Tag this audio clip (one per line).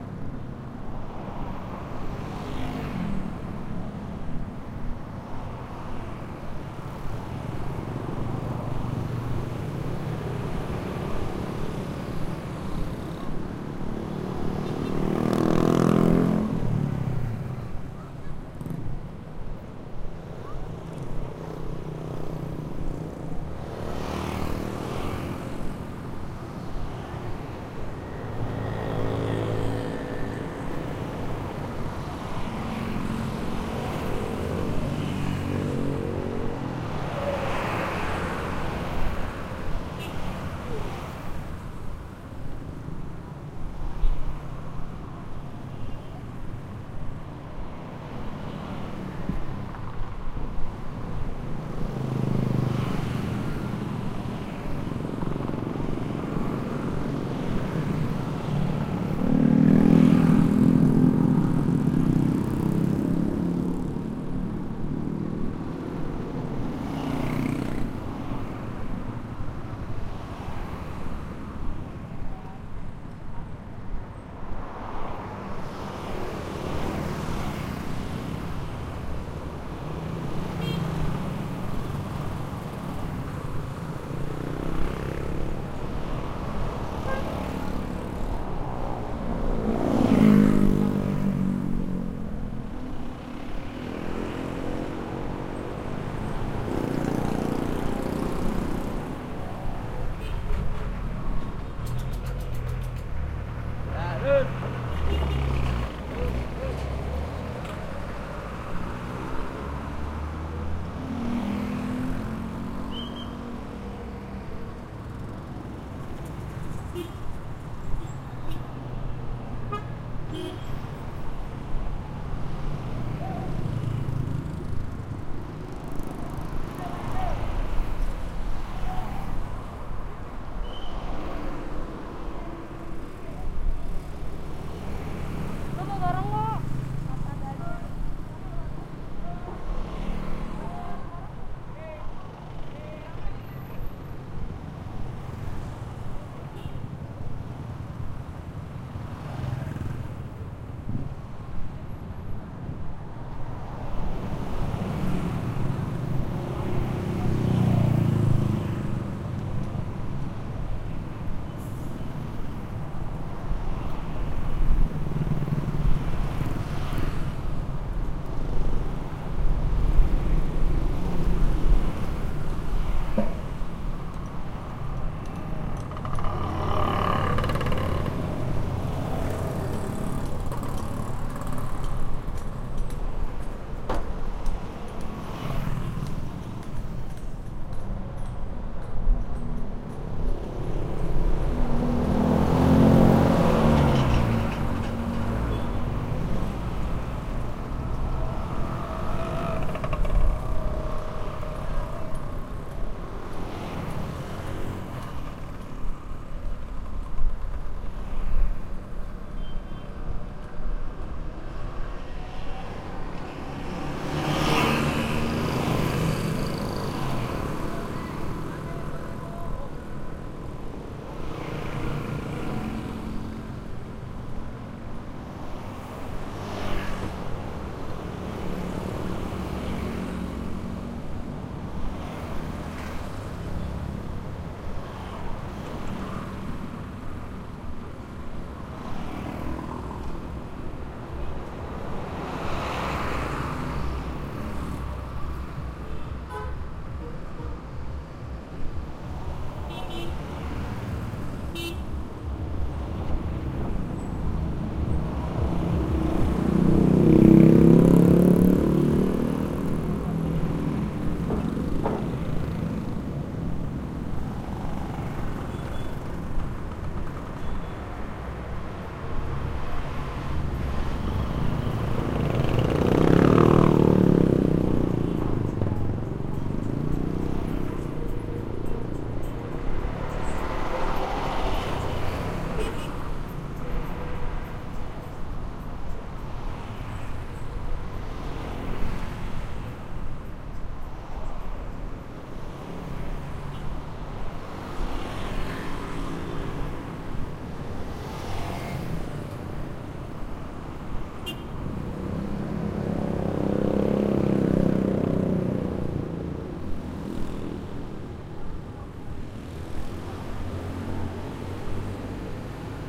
city
street
jakarta
field-recording
indonesia
traffic